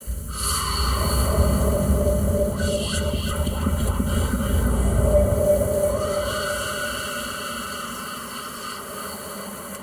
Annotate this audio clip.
Whispering Man
Vocal: Mr ROTPB
Recorded Tascam DR-05X
SFX conversion Edited: Adobe + FXs + Mastered
Soumdscape, effects, fx, Atmosphere, Horror, Ambient, Noise, Movie, Alien, Creatures, Futuristic, Voice, Field, space, Radio, Scary, Recording, Sound, Film, Vocal, Dark, Man, SFX, Cinematic, Whispering, sound-effects